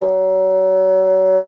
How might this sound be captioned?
fagott classical wind